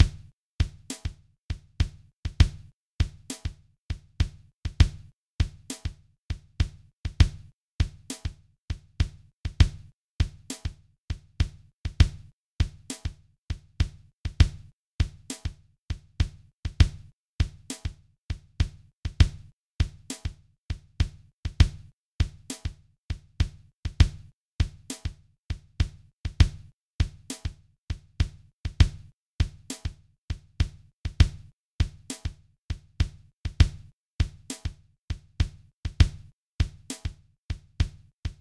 Simple drum loop, with a gentle version of the on the one funk beat. Uses the Reason brush kit. Generated in Reason 2.5.